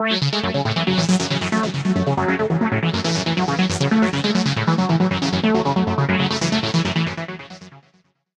Psy Trance Loop - 138 Bpm 000
PsyTrance Loop psy goatrance goa